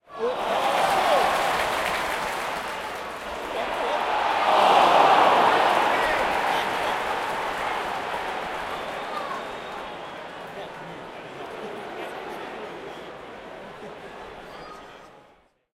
Football Crowd - Near Miss ooh - Southampton Vs Hull at Saint Mary's Stadium
Recorded at Southampton FC Saint Mary's stadium. Southampton VS Hull. Mixture of oohs and cheers.